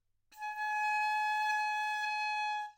Piccolo - G#5 - bad-timbre
Part of the Good-sounds dataset of monophonic instrumental sounds.
instrument::piccolo
note::G#
octave::5
midi note::68
good-sounds-id::8379
Intentionally played as an example of bad-timbre
Gsharp5, piccolo, good-sounds, neumann-U87, single-note, multisample